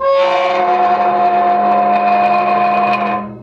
Heavy wrought-iron cemetery gate opening. Short sample of the shivery groaning sound of the hinges as the gate is moved. Field recording which has been processed (trimmed and normalized).
squeak, gate, hinges, metal, iron, moan, groan